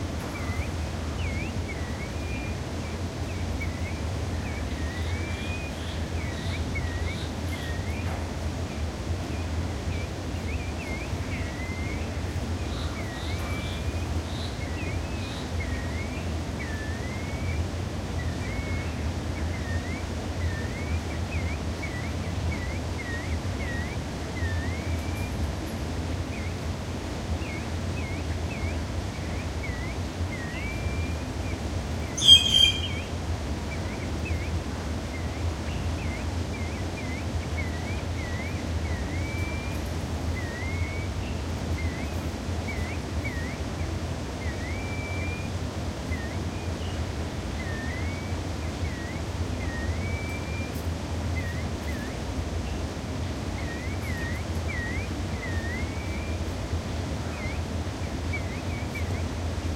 Quiet chirping and some movement from two Crested Partridges. Also a call from a Green Jay. Recorded with a Zoom H2.
aviary, bird, birds, chirp, exotic, field-recording, jay, partridge, tropical, zoo